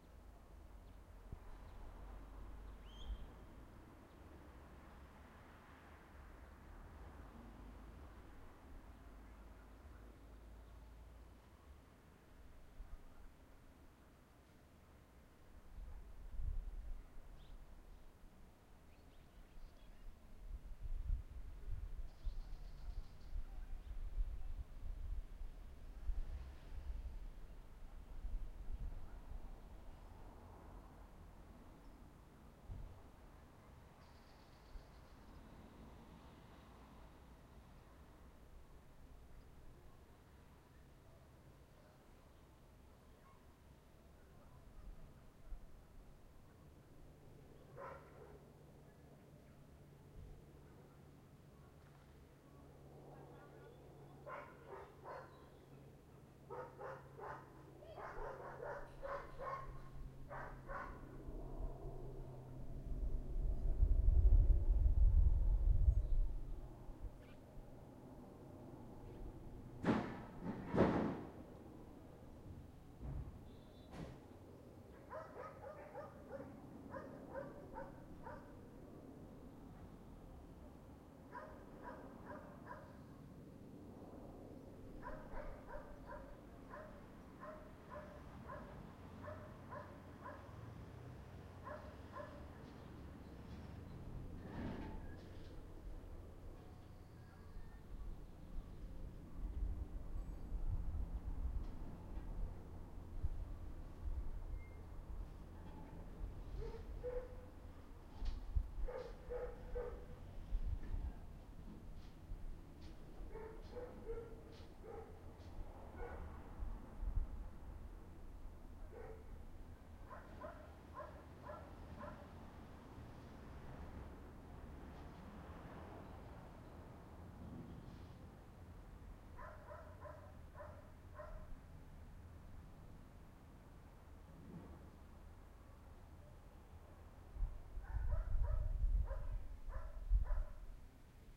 Unloading sounds in a village
Sounds of unloading heavy items from a truck or simmilar vehicle. Distant cars
Recorded with a Zoom H1 fitted with a windshield, on 19 August 2016 around 19:00.
unloading, rural, labour, ambient, village, work, field-recording, ambiance